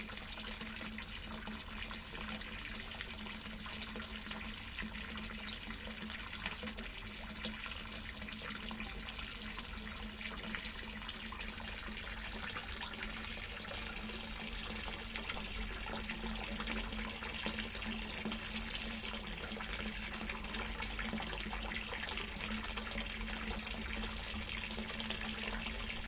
STE-014-lisbonfountain01
A fountain in the Alfama district of Lisbon.
field-recording, fountain, lisbon, water